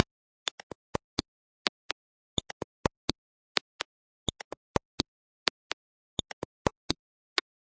static-like percussive loop